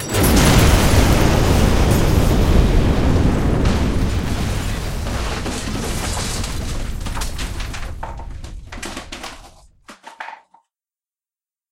Huge Explosion Part 3 - Long Crash
Part 3 of a ridiculously huge parody of a nuclear explosion for comedic effect (Played after part 1, simultaneously with part 2)
Created using these sounds:
blast, bomb, boom, comical, crash, debris, detonation, explosion, funny, humorous, nuclear, smash